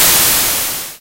Various drum and percussion sounds made only out of brown, pink and white noise and a few effects in Audacity.